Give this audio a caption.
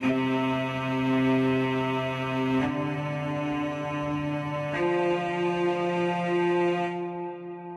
Wicked Marcato Dump
Some quick orchestra peices I did I broke it down peice
by piece just add a romantic pad and there you go, or build them and
then make the rest of the symphony with some voices and some beatz..... I miss heroin....... Bad for you....... Hope you like them........ They are Russian.
ambient, happiness, melody, love, orchestra